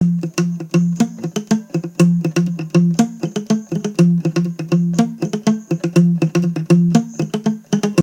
DARK CONES Guitar2
A collection of samples/loops intended for personal and commercial music production. All compositions where written and performed by Chris S. Bacon on Home Sick Recordings. Take things, shake things, make things.
samples, sounds, drums, guitar, free, acoustic-guitar, drum-beat, rock, Indie-folk, Folk, bass, loops, vocal-loops, piano, percussion, acapella, harmony, beat, loop, indie, voice, synth, original-music, melody, whistle, looping